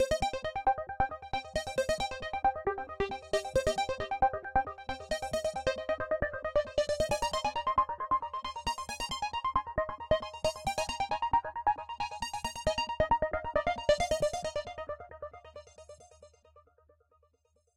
peace man c 135
soft melodic trance loop
arp, loop